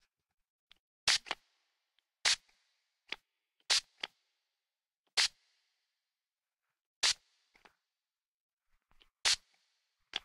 Aerosol Spray.L
The sound of an aerosol bottle being sprayed
sound-effect, Spray, Spritz